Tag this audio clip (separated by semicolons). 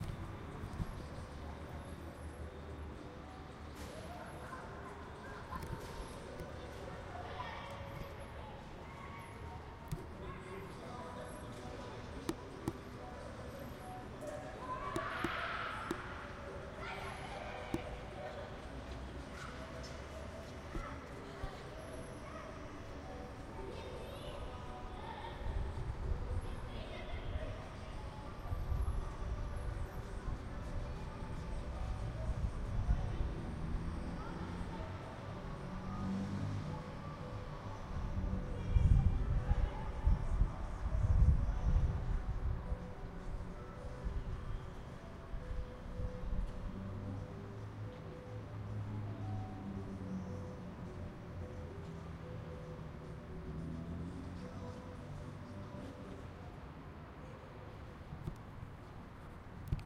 field; odessa; recording; ukraine